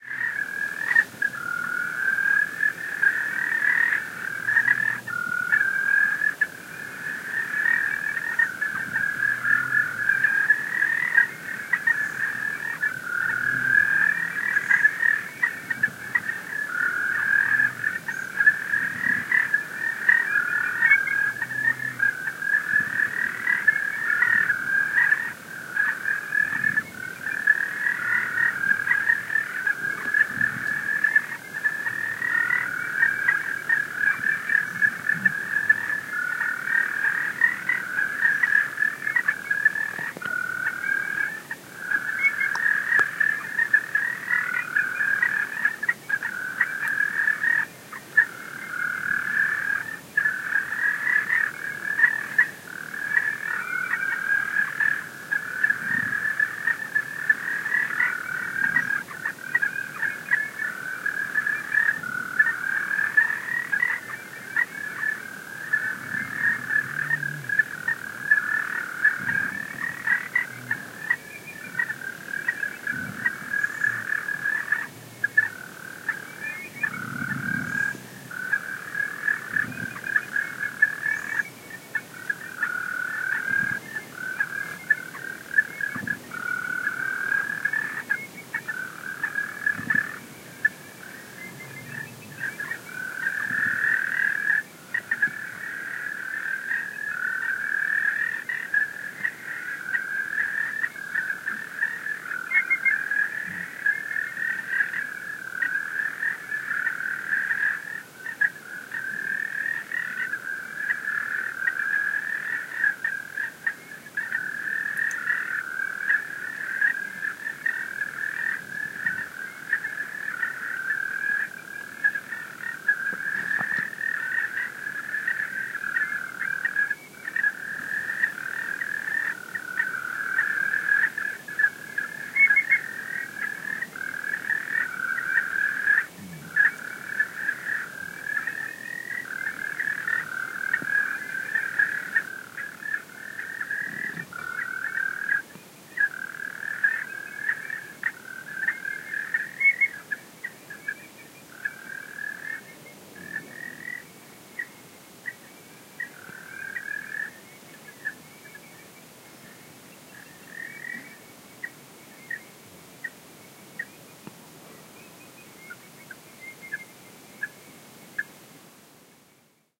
Cloud Forest Frogs
Cloud forest night-time frog chorus, along Machu Picchu Inca Trail at 3650 meters, near Phuyupatamarca, Peru
nature, frog, South-America, equator, trek, Machu-Picchu, Peru, nature-sounds, rainforest, hike, field-recording, forest, frogs, mountain